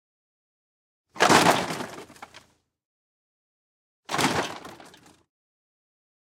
Scooter Fall Over Impact Fiberglass Asphalt
Scooter fall over and hits asphalt.
fiberglass, vehicle